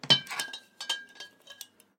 Clink of bottles of spirit.